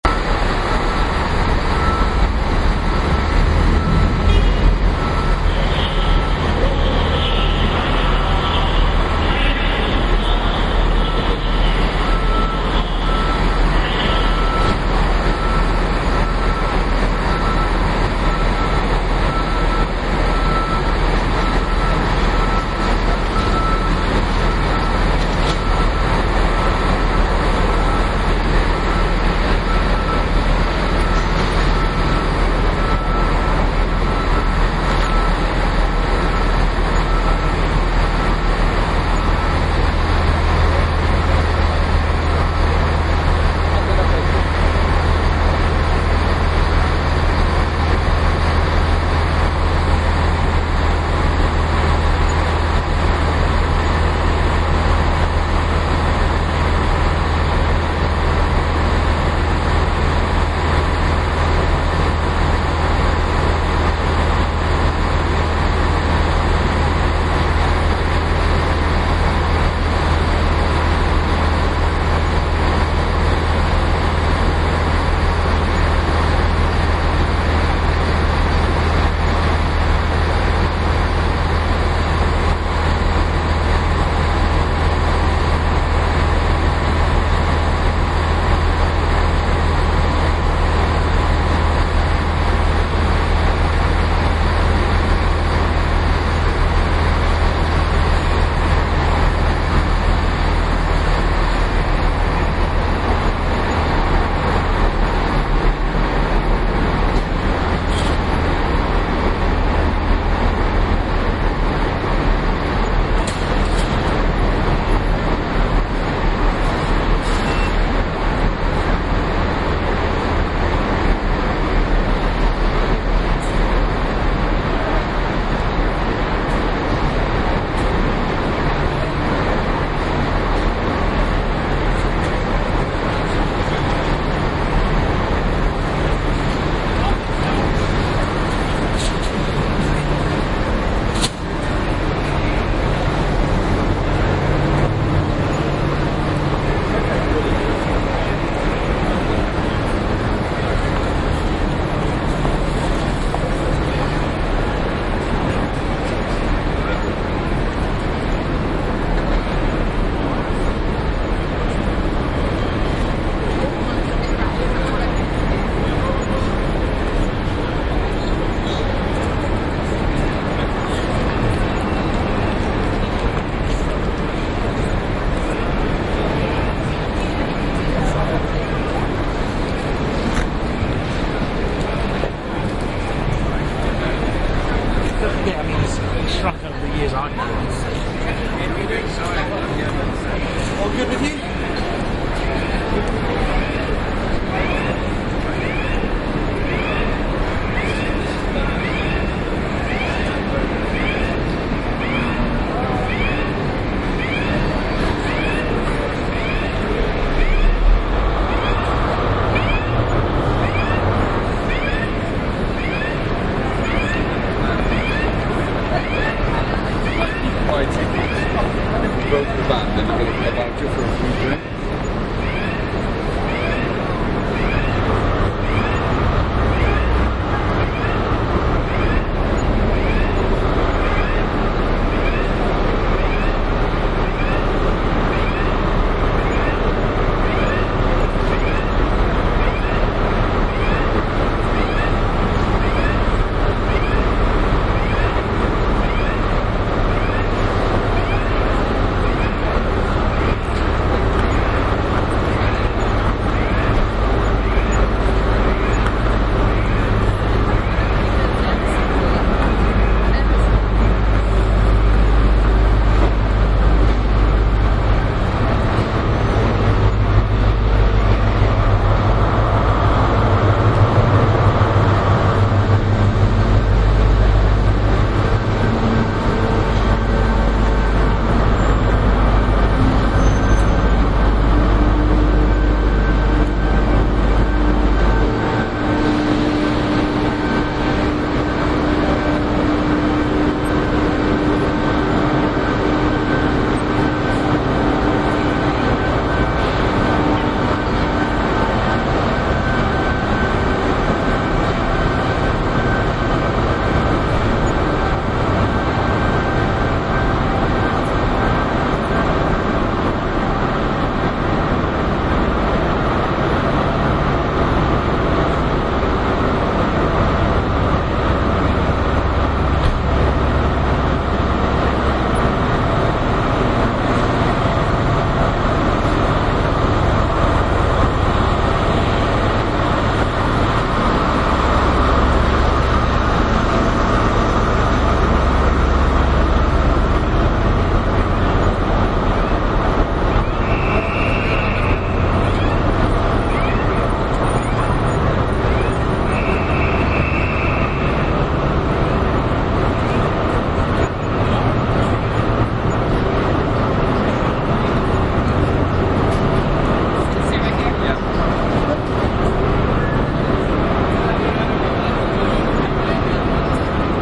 Paddington - Train station